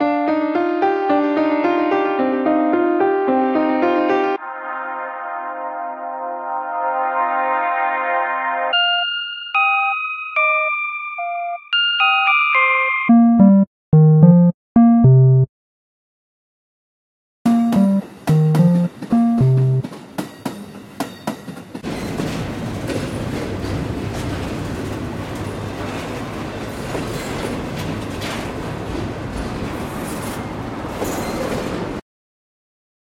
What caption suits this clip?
Mgreel piano, synths and trains
I put together a few synth lines, piano, melodies, a bass-line, one rhythmic train field recording and a 20 second field recording of a train passing by. See it as separated tracks from a song.
The synths lines were created in Ableton Live at 110 BPM..
This is my first mgreel with a bit of musicality. All suggestions are welcomed.